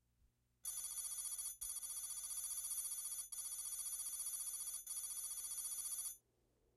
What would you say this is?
Sound of a data stream scrolling across a movie or TV screen.
communications, stream